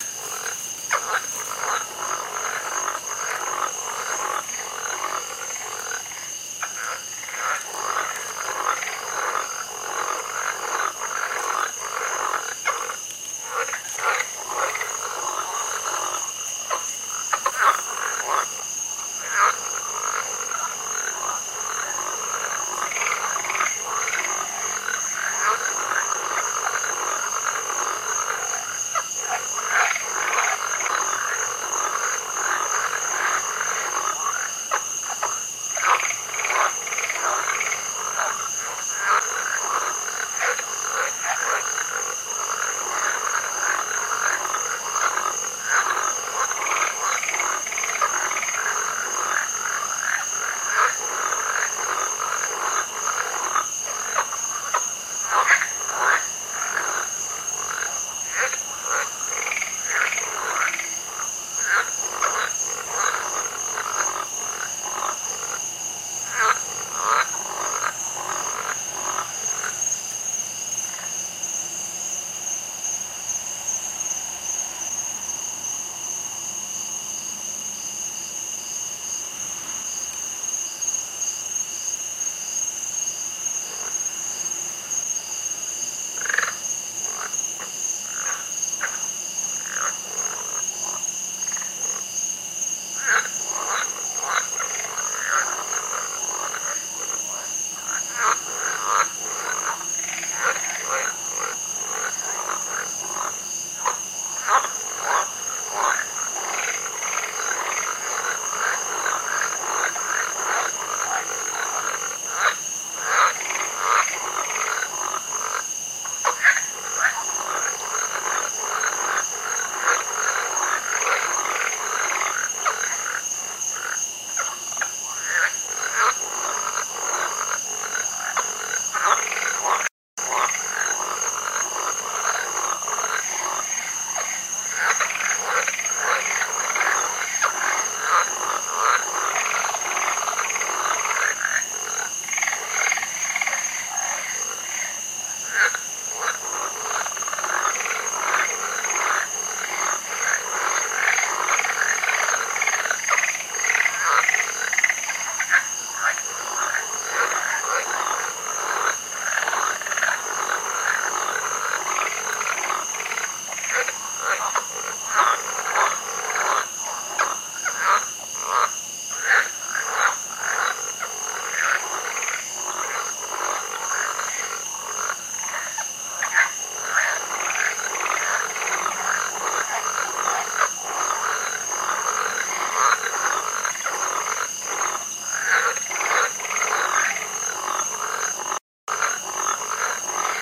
Frogs in Alliagtor Creek at 4am
Frogs, toads, night creatures in a small fresh water creek in Clearwater, Florida. Recorded at 4 a.m. in June